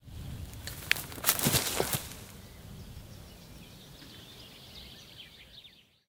Body fall in grass DISTANT
Distant recording of the body fall on the ground with grass during the summer day. Fall, grass, birds in distance. Recorded with Rode NTG2 pointing on the target.
body, dirt, distant, fall, grass, ground